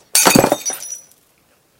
Breaking Glass 3
Includes some background noise of wind. Recorded with a black Sony IC voice recorder.